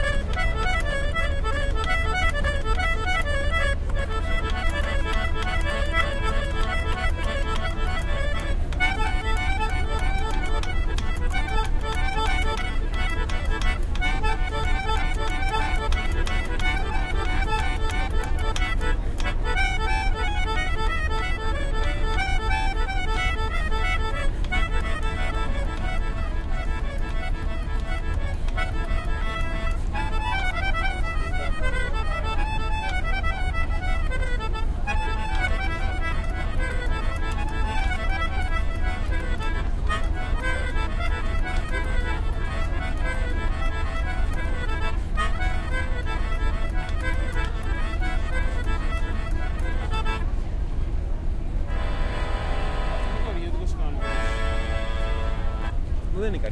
boat melodica
A greek friend playing a romanian folklore theme on his melodica on a boat. You can hear the noise from the boat's engine.
boat, engine, folklore, greece, melodica, romanian